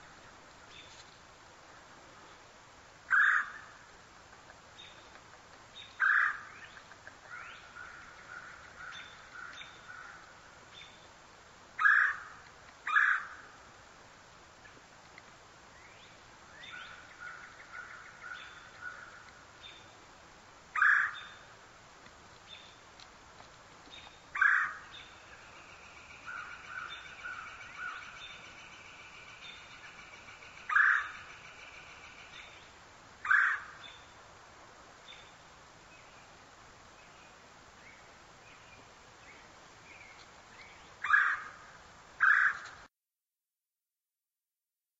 A recording of a Red Bellied Woodpecker in Beamer Conservation Area. If you listen closely you can here him as he pecks at his hole between calls.